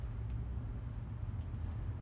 Very short room tone of a basement
cellar, room-tone